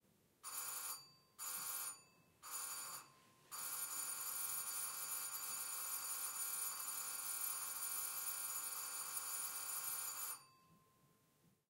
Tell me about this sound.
Doorbell ringing - Far2 [d15]
An old doorbell ringing distant perspective. Recorded in an apartment with Zoom H4n Pro.
doorbell, far, INT, old, ringing